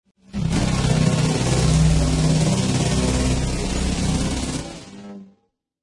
Large Alien Machine Call
A heavily proccesed saw wave. Meant to sound like the Reapers in Mass Effect.